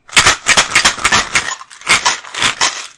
The sound of pills in a bottle taken with a Blue Yeti mic
bottle, shaking, container, shake, pills